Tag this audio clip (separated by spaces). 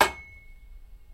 field-recording hit